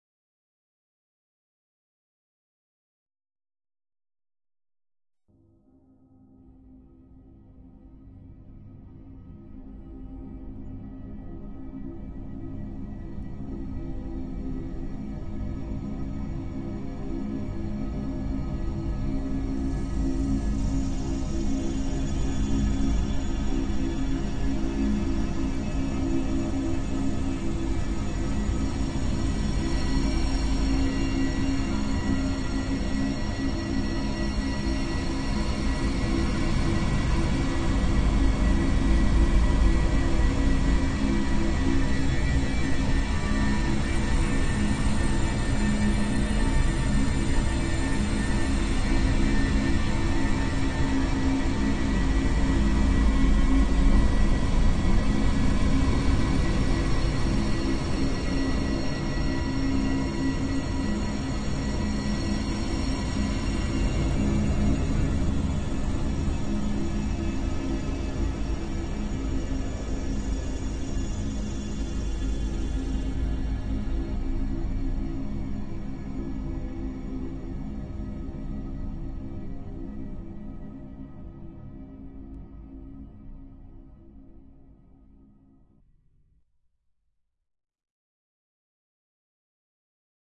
my own associative sound theme for "earth" - an abstract synthetic soundscape which constantly move in the stereo-field and gradually evolves into a crescendo of noise. sound sources for this were different flavours of filtered noise, high-frequency modulated sinewaves and some chorusing oscillators. frequency of the sinewaves was varying as they went through a bank of fixed-freq resonators. noises were filtered with resonant highpass and lowpass filters, pitch shifted and then processed with granular principles. i used a spectral morphing algorithm to morph between the spectral content of the noise layers and create a constantly shifting texture. other manipulations included convolution of pure sinewaves with the frequency-modulated sinewaves, time-stretching, spectral filtering and delay/feedback. synthesis was done using Supercollider, additional editing in Peak.